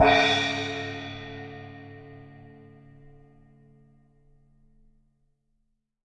Cymbal recorded with Rode NT 5 Mics in the Studio. Editing with REAPER.